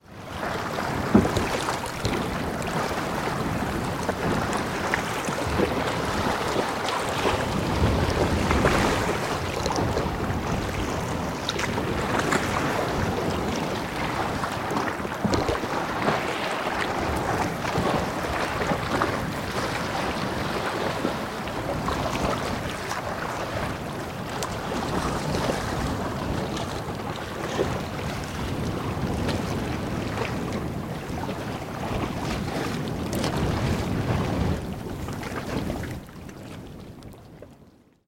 Splashing Icebergs at Danco island in the Antarctica Peninsula
Recording of an Iceperg splashing in the water at Danco Island in Antarctica Peninsula, using a Shotgun Microphone (Schoeps)
Iceberg, Recording, field, splashing